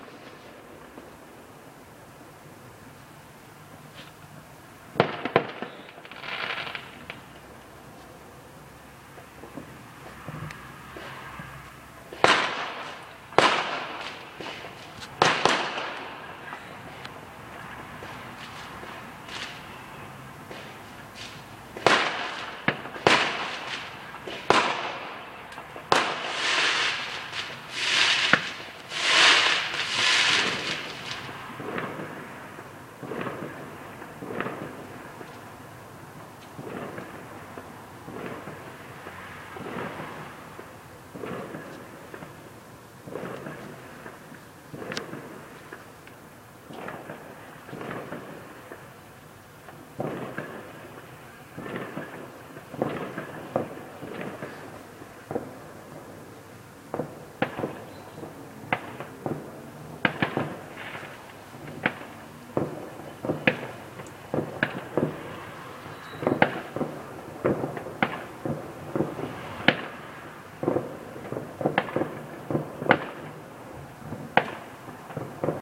Fireworks exploding in a suburb on Bonfire Night 2014 (5th November).